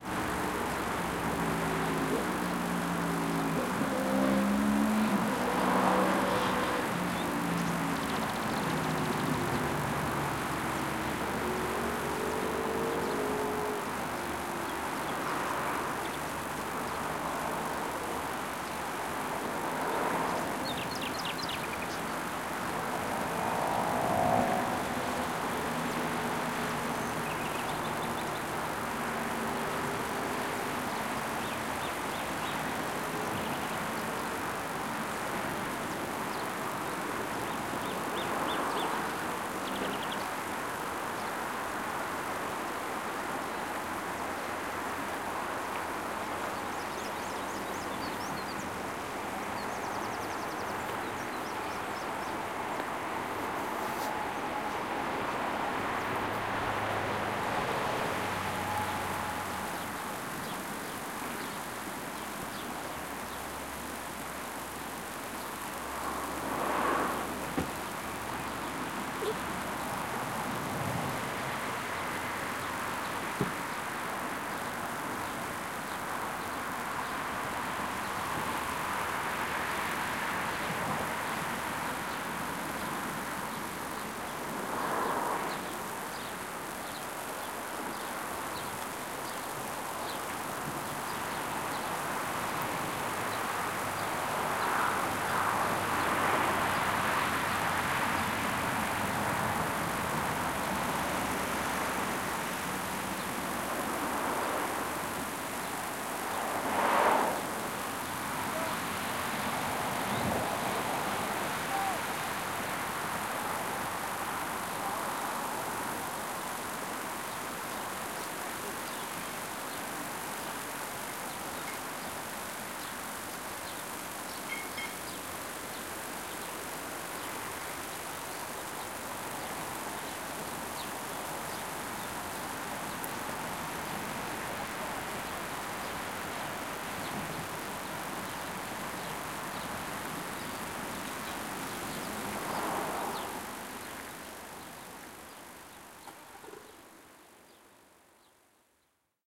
High voltage pylon - Rome
Recording carried out under a high-voltage pylon, just nearby via Pontina. Above all sound predominates noise generated by the strong electromagnetic fields, audible even to dozens of feet away and along the path of the suspended cables.
Recorded with Zoom-H4n
Registrazione effettuata sotto un traliccio dell’alta tensione a lato della via Pontina. Sopra tutti i suoni predomina il rumore generato dai forti campi elettromagnetici, udibile anche a decine di metri di distanza e lungo il percorso sospeso dei cavi.
Registrato con Zoom-H4n
magnetic, buzz, soundscape, field-recording, drone, electricity, hum